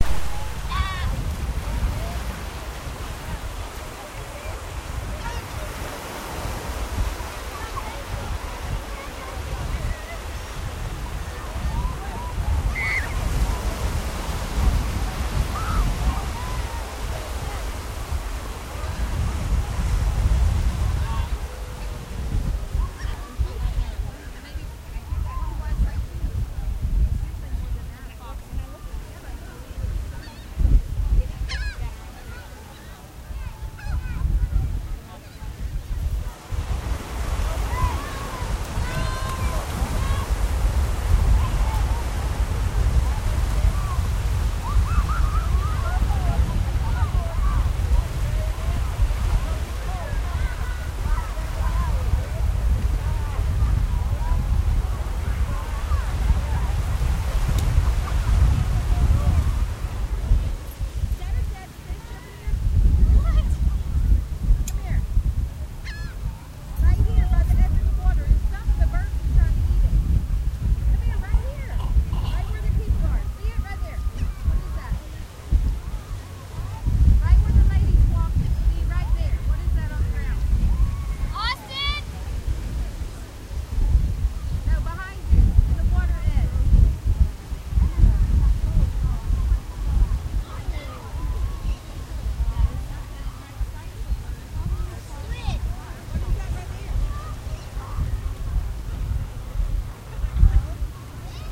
Beach goers and surf
Recorded on Clearwater Beach Florida USA. While doing a story for broadcast I thought, the sounds around me might be helpful to someone somewhere. This was June 10, 2013, about 10:30am. Enjoy.
Clearwater, Florida